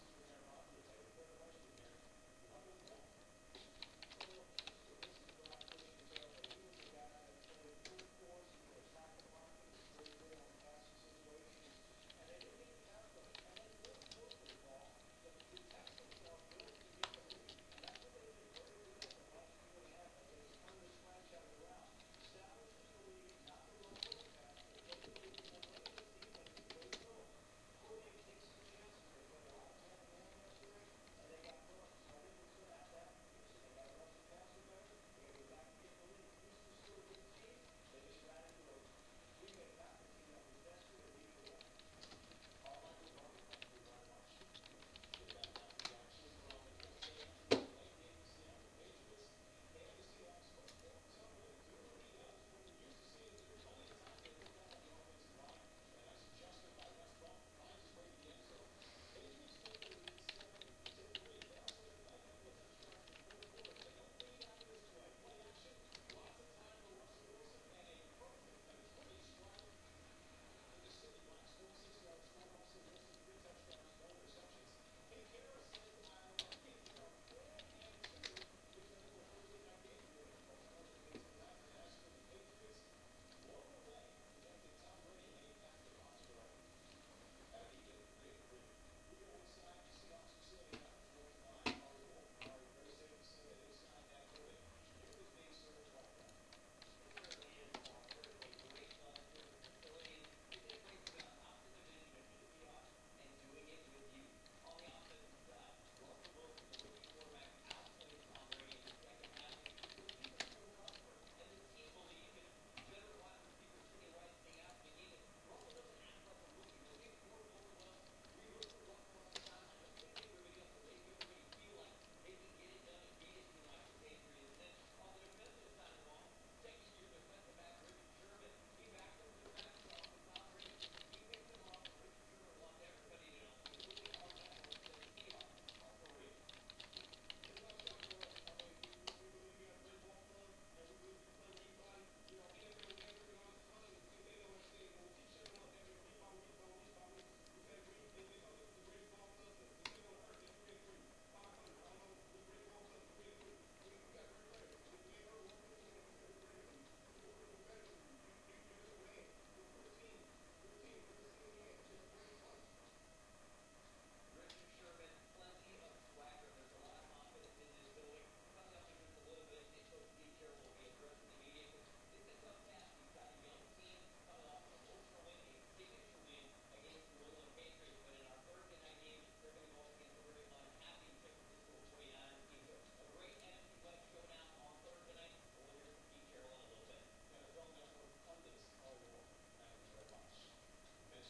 Just surfing the internet while the family is watching TV.